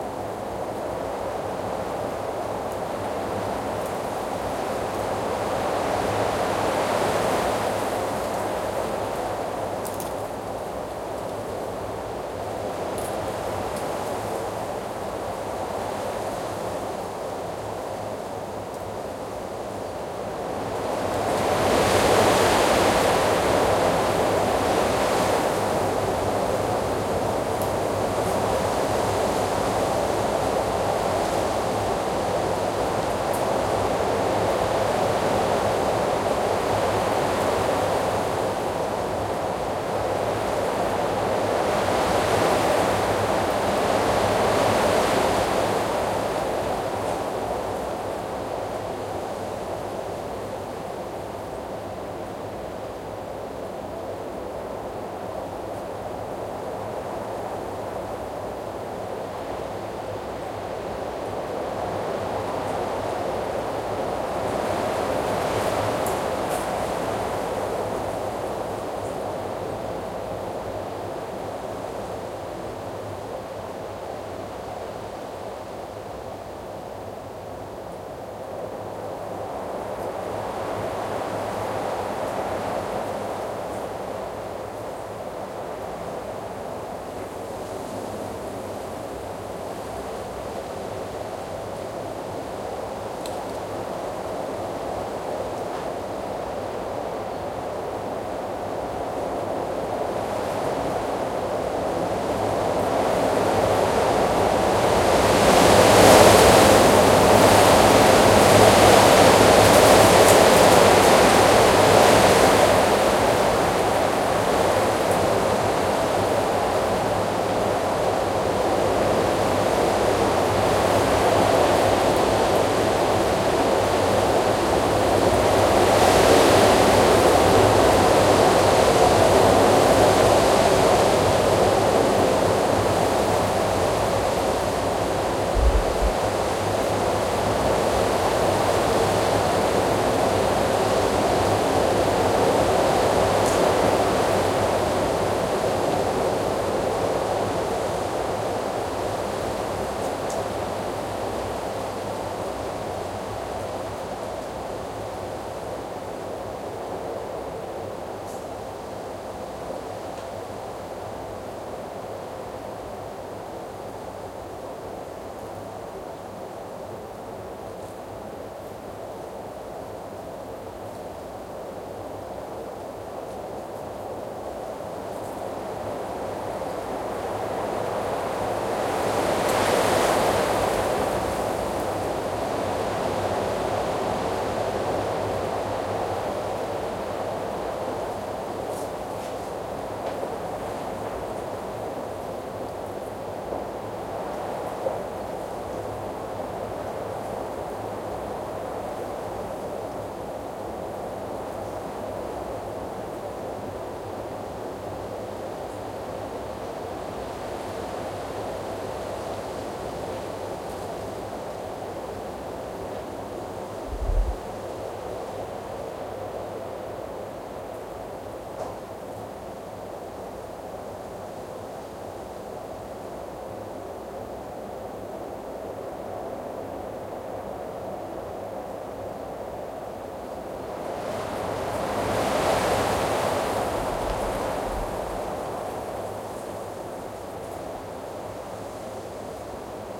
Wind medium to strong gusts in remote countryside (France)
Low mountain wind in secluded location in South of France at night, gusts of wind, almost no other noise, quite clean. A few drops of rain lightly audible.
Recorded with Tascam DR-40 internal microphones
mountain, windy, wind-howling, wind, howling, gusts